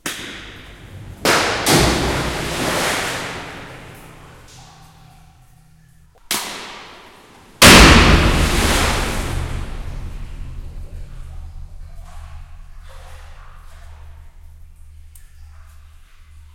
it is deep wet well recorded H4n zoom